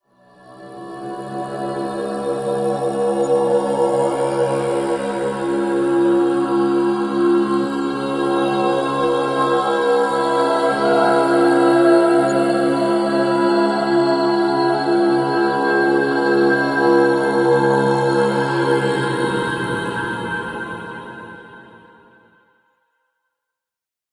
REMIX OF 15488 AND 26722 - SPOOKY VOCAL ATMOSPHERE 01
This sound is a remix of 15488 ("Tibetan Chant" by user Djgriffin) and 26722 ("Women Singing" by user Leady). The sounds were simply layered with some stereo expansion to give a creepy atmosphere which might work well in a horror movie.